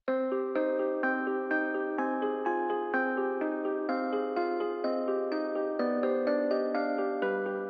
Christmas Melody Loop
christmas melody by me madded in flstudio with FLEX
christmas, flstudio, free, loops, music